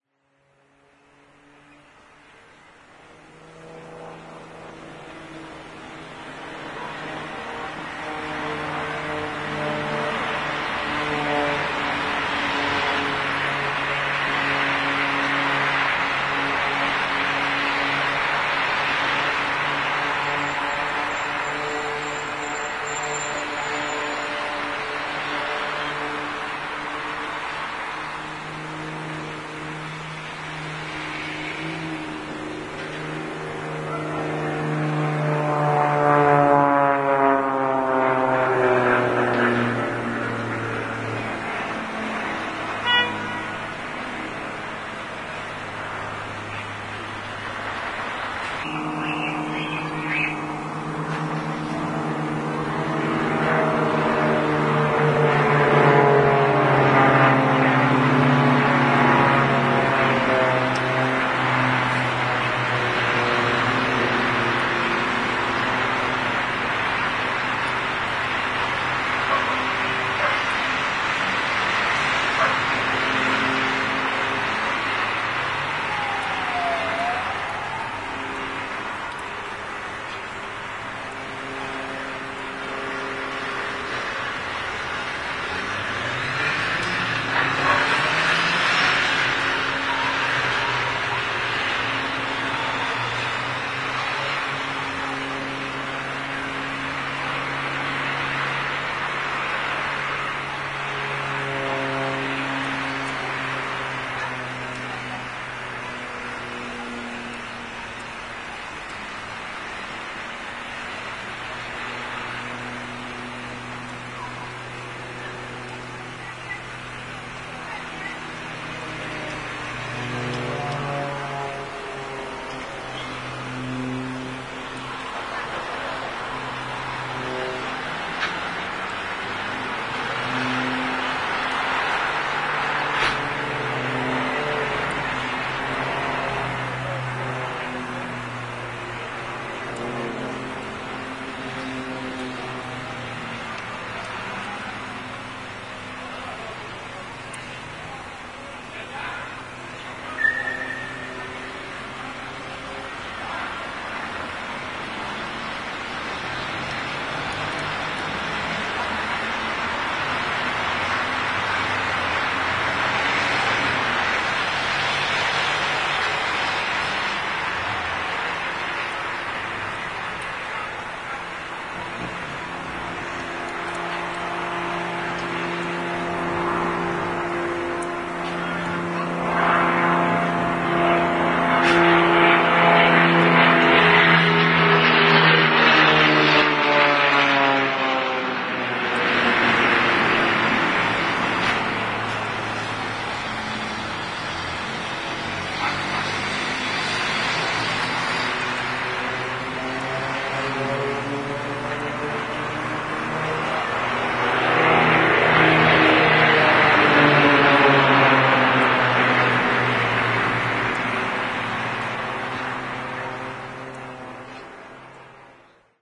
12.09.09: about 13.00 in Poznań/Poland; sound producing by planes during aerobatics; I was recording from my balcony